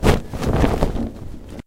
heavy cloth rustle
I just modified it so it can sound like someone took of their coat and threw it on the floor.
cloth, clothing, fabric, heavy, rustle, rustling